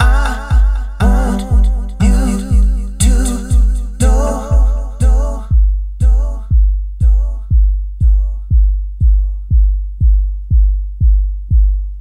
I Want You To Know 120bpm
"I Want you to know" with a 4/4 808 Kickin'
808Kick, house, Vocal